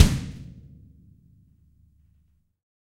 rock-metal kick
kick, kicks, drum, drums, drum kit, drum-kit, drumkit, percussion, tama, dw, ludwig, yamaha, sample, blastbeat, beat, blast-beat, hard, bass
bass, beat, blast-beat, blastbeat, drum, drum-kit, drumkit, drums, dw, hard, kick, kicks, kit, ludwig, percussion, sample, tama, yamaha